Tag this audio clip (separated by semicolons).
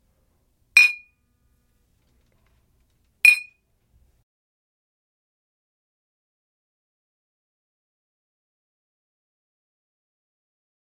vidro; brinde; toast; glass; copo